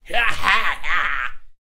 A clean human voice sound effect useful for all kind of characters in all kind of games.